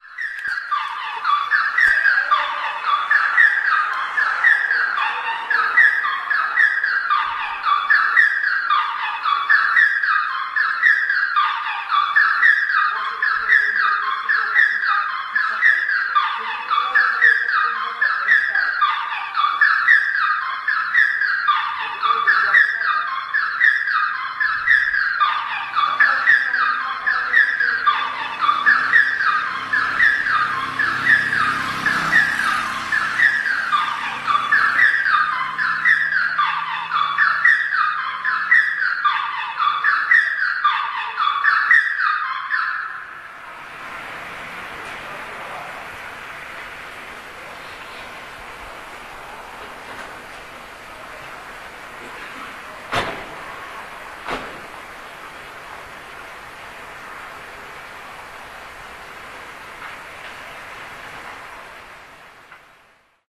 alarm sklep
18.12.09: about 18.00; Poznan (Poland), Wilda district. the burglar alarm in the butcher's shop on Górna Wilda street. Reecording from the balcony exactly in the front of that butcher's shop.